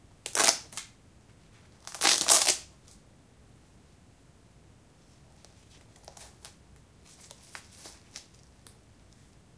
Hook-and-loop-fasteners-on-climbing-boots
Opening hook-and-loop fasteners on climbing boots
climbing-boots, clothing-and-accessories, Hook-and-loop-fasteners